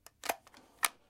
Whisk Reload 04
Clicking a whisk button to emulate a handgun reload sound.